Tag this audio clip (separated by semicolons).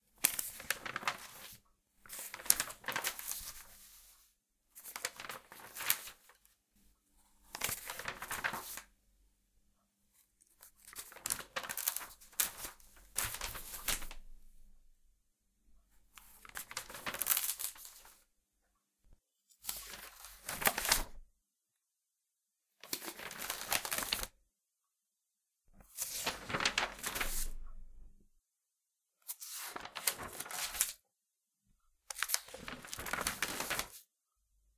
book; flick; flip; newspaper; notebook; page; pages; paper; read; reading; sound; turn-over